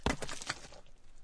Stein Aufschlag mit langem Decay 10
Recorded originally in M-S at the lake of "Kloental", Switzerland. Stones of various sizes, sliding, falling or bouncing on rocks. Dry sound, no ambient noise.
close-miking, sliding, stone, debris, fall, hit, boulders, bouncing, movement, nature